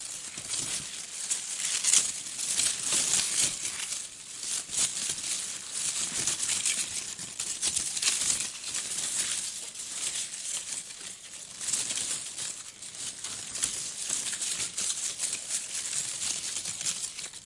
Recorded with Zoom H6, XY
Moving Hard Plants Leaves Close ASMR 6